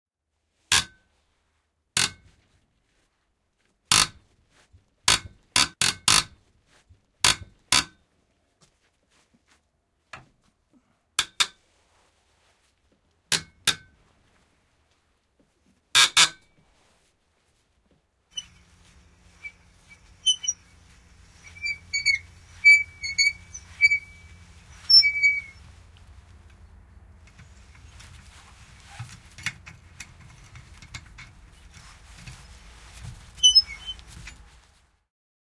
Mutteri, vääntö kiinni ja auki / A rusty nut, twisting a nut, tightening and screwing loose, metal creaking and squeaking, a close sound, interior
Ruosteinen mutteri, kiristetään kiinni, ruuvataan auki, narinaa ja vinkumista. Lähiääni. Sisä.
Paikka/Place: Suomi / Finland / Vihti, Palajärvi
Aika/Date: 04.12 1995
Creak, Field-recording, Finland, Finnish-Broadcasting-Company, Interior, Iron, Metal, Metalli, Mutteri, Narista, Nut, Rauta, Ruuvata, Screw, Soundfx, Squeak, Suomi, Tehosteet, Vinkua, Yle, Yleisradio